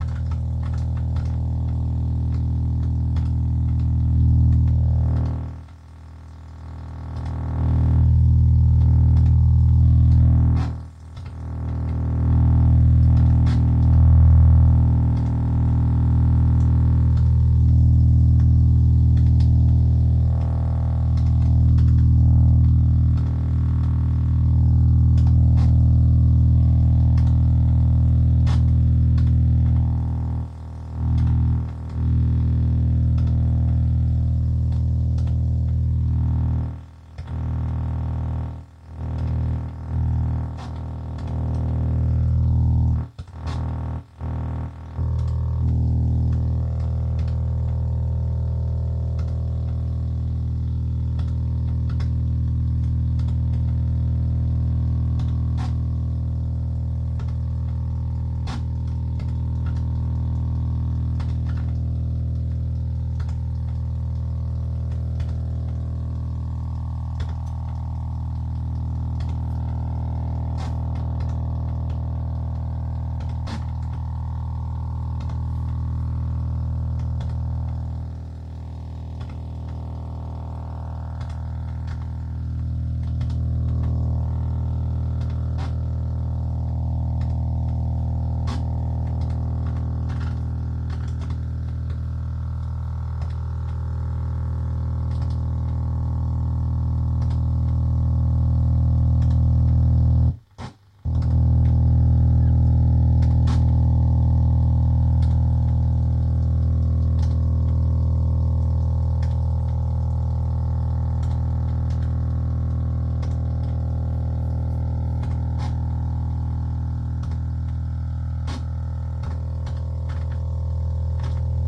tube radio shortwave longwave noise low hum
Low hum noisy signal, captured on an old tube radio with a long antenna.